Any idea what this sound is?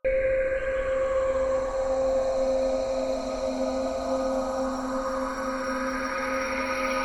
Underwater glassy Ambient Orbital